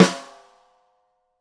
Unlayered Snare hits. Tama Silverstar birch snare drum recorded with a single sm-57. Various Microphone angles and damping amounts.
drum, shot, sm-57, Snare, unlayered